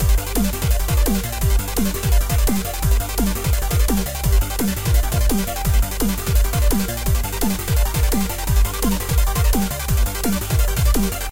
Weird electronic loop
Created with sequenced instruments within Logic Pro X.
dnb, drum-and-bass, edm, electronic, heavy, loop, loops, music